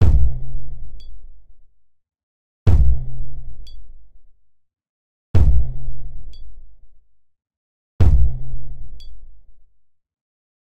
Ambient Groove 011
Produced for ambient music and world beats. Perfect for a foundation beat.
loops, ambient, groove, drum